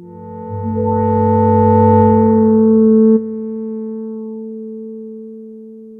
tubular system C2
This sample is part of the "K5005 multisample 11 tubular system" sample
pack. It is a multisample to import into your favorite sampler. It is a
tubular bell sound with quite some varying pitches. In the sample pack
there are 16 samples evenly spread across 5 octaves (C1 till C6). The
note in the sample name (C, E or G#) does not indicate the pitch of the
sound. The sound was created with the K5005 ensemble from the user
library of Reaktor. After that normalizing and fades were applied within Cubase SX.
bell, reaktor, experimental, tubular, multisample